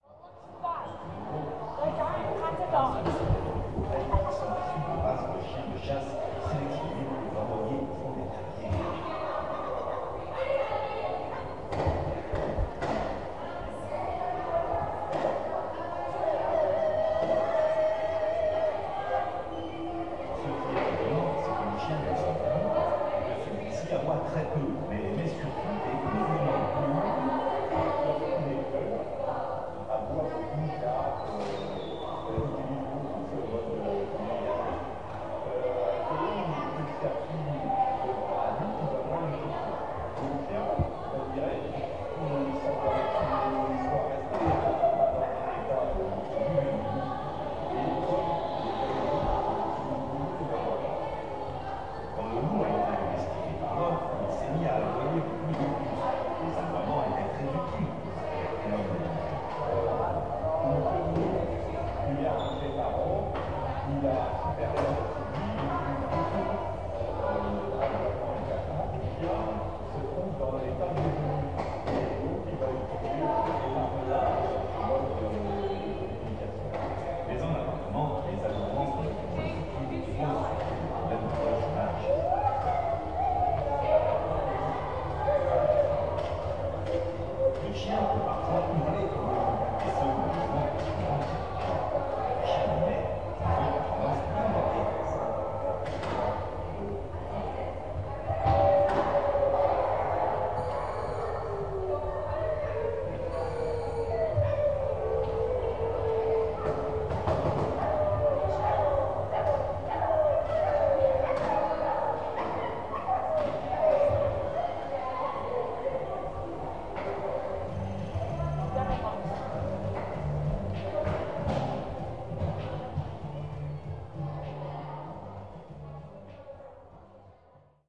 musee de la civilisation quebec 09.05.17 004
09.05.2017: Musee de la civilisation in Quebec in Canada. Sounds of exhibition - general ambiance.